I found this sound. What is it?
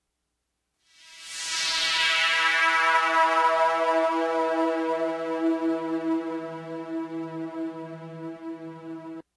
Just some cool short synth pads free for your mashing